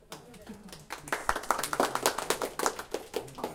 applause people funny humor human joke story
A group of people applauds. These are people from my company, who listen story about one of them.
Recorded 2012-09-28.
AB-stereo